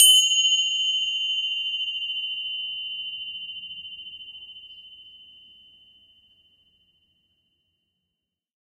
ornamental "manjeera" finger bells. A little over modulating in tone but still sounds pretty.
Ornamental manjeera